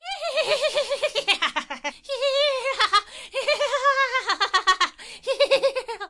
59grito loco agudo
scream, mad, crazy